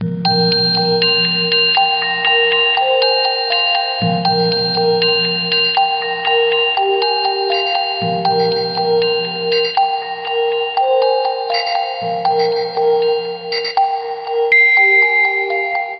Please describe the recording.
Fantasy SFX 001
A mystical phrase from "About the House" by Joel Graham the original piece is found here
phantasm, portal, fantasy, imaginair, mystery, storytelling, imminent, bell, cave